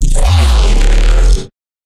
Crunchy Vowel 1
Vocoded bass using my voice and a saw wave. Then resampled multiple times using harmor, followed by reverb techniques.
Bass, Crunch, Fourge, Neuro, Vocoder, Vowel